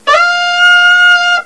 High register
skonk
on gourdophone
Recorded as 22khz